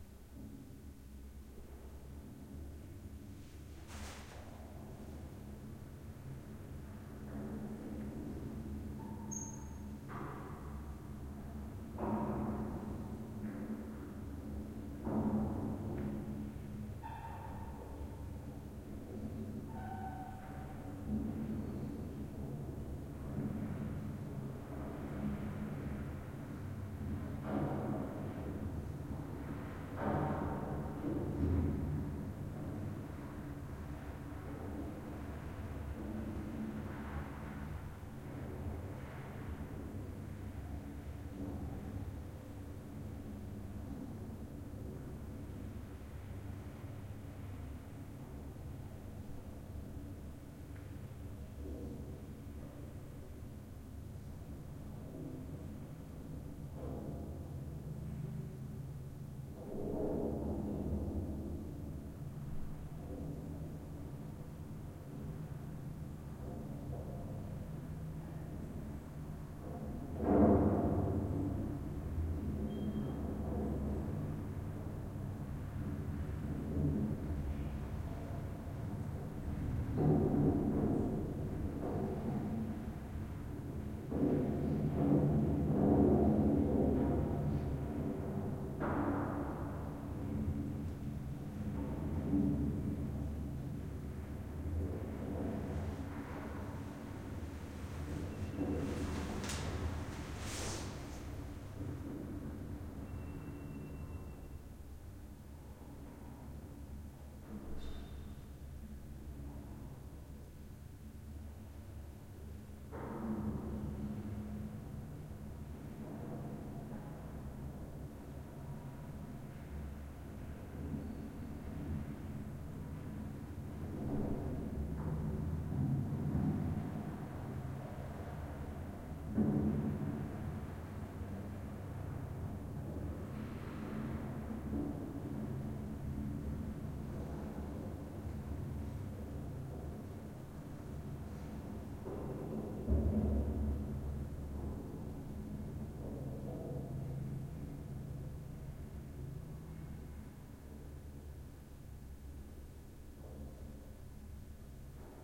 Stairs Int Amb of huge building reverberant doors lift 2
Doors, Stairs, Reverberant